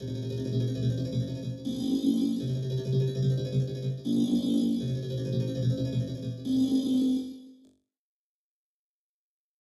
crunchy space
simple noise: freak the sound and add drums
crunchy, electronic, noise, loop